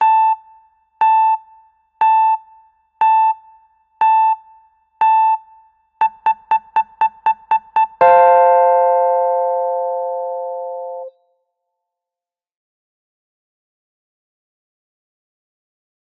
10sec countdown bell sound
it is simple countdown made a synthy sound. no voice, 60bpm when 6sec, 120bpm when 7~9sec. and end Fcord.
10sec, 120bpm, 60bpm, countdown, no, synthy, voice